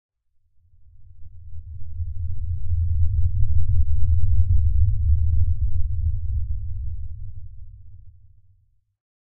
Bassy rumble, sounds almost like the earth moving or a distant explosion.
ambient, dirge, soundscape, dark, pad